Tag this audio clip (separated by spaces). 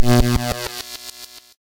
8bit videogame